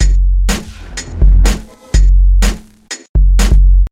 big beat, dance, funk, breaks